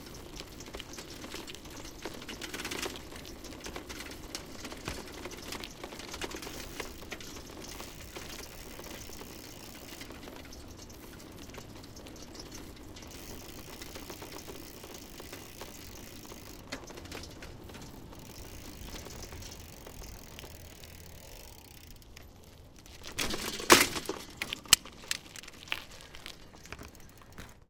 Shaky Bike Ride and Drop
Riding the bike and then dropping a light after dropping down the pavement.
Recorded with Zoom H2. Edited with Audacity.
bicycle, bike, shaking, city, shaky, berlin, metal, cycling, fahrrad, night, vehicle